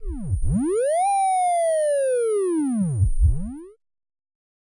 Attack Zound-221
Some electronic frequency sweep glued together with some strange interruptions. This sound was created using the Waldorf Attack VSTi within Cubase SX.
electronic, soundeffect